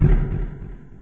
A simple JUMPSCARE sound I made with my voice.
It is useful at some situations.
deep, jump, voice